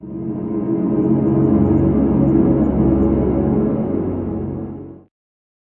Pad from deep space. Sounds like a very dramatic drone.
Very large sound.
You can improve the "unnatural" release of this sample by using a reverb.
3 transpositions available.
Drone, Reverb, Chord, Dark, Wide, Ambient, Complex, Pad, Deep, Space